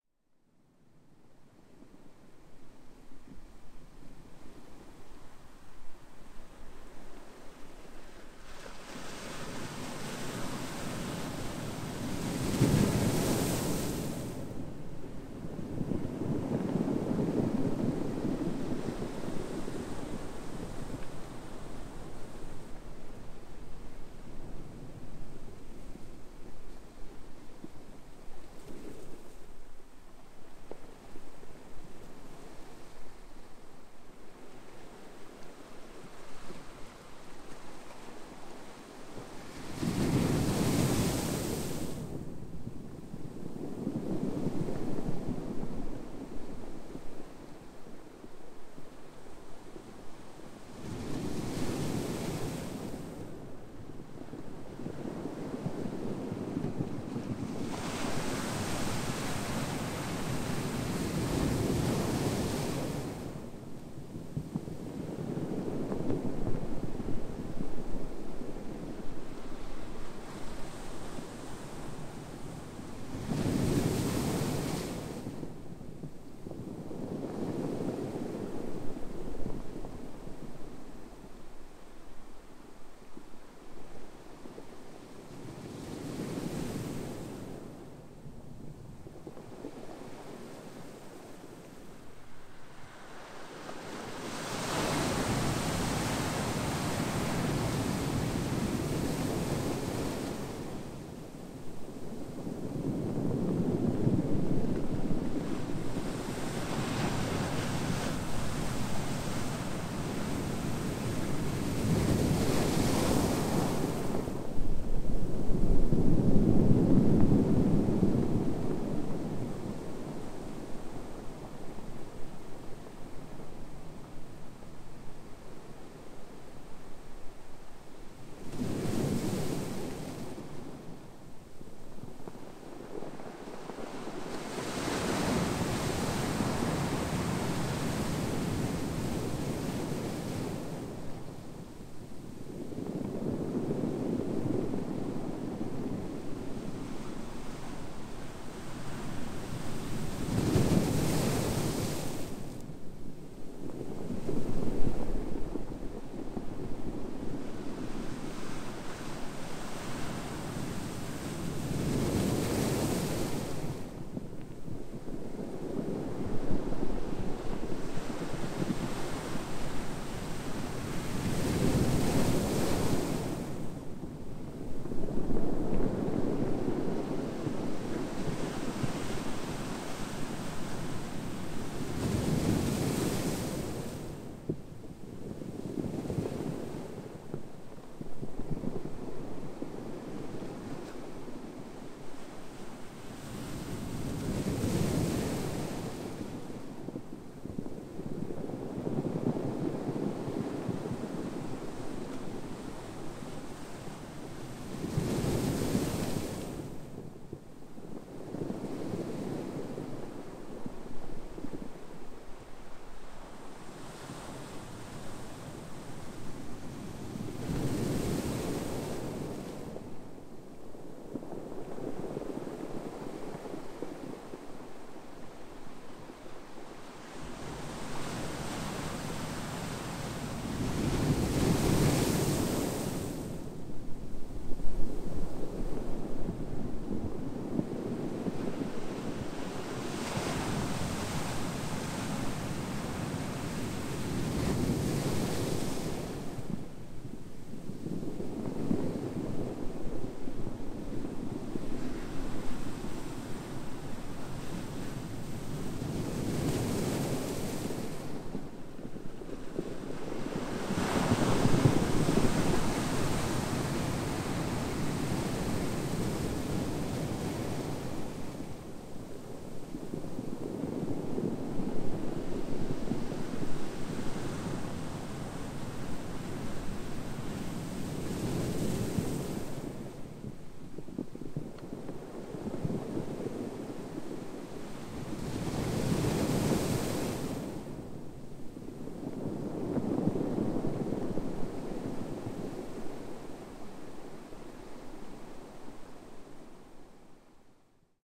beachbreak cobblestones
Short intense beachbreak with cobblestones in Madeira
Recorded with Rode VideoMicro and Rodeapp in iPhone
coast, atlantic, water, waves, seaside, madeira, surf, splash, field-recording, shore, wave, beach, ocean, splashing, nature, breaking-waves, tide, sea-shore, inconsistent, seashore, sea